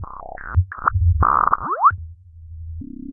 bc8philter24
various bleeps, bloops, and crackles created with the chimera bc8 mini synth filtered through an alesis philtre
alesis-philtre, synth, bloop, bleep, crackle, chimera-bc8